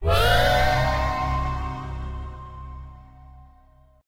a modulated sting, a bit organic with some wah
modulated, scary, spooky, organ, shock, terror, sting, sci-fi, haunted, suspense, thrill, wierd, synth, digital, creepy, drama, surprise, sinister, dramatic, electronic, horror